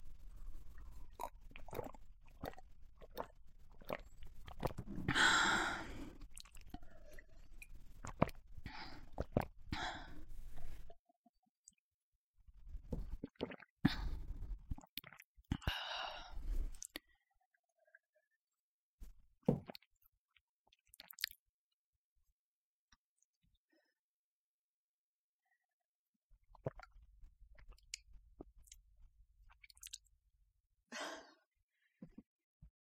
Drinking Sounds
Drinking water, milk and liquid slow and fast variation. Variations of sips and chugs.